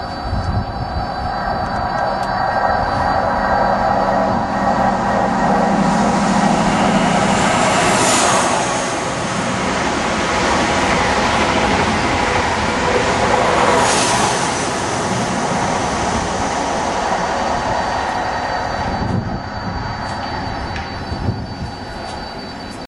Just a simple recording of an HST whizzing past me at about 100MPH accelerating to 125MPH however